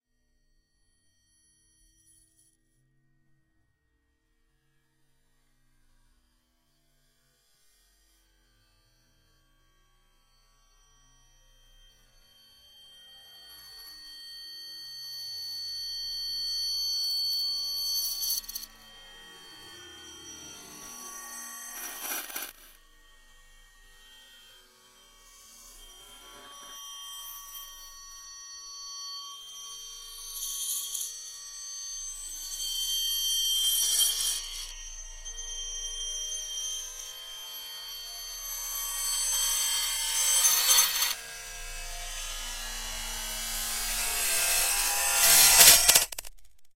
long glass break in reverse
a longer distorted sound of glass breaking, a lot of high pitched eerie noises, rising in volume at the end.
break, glass, reverse, shatter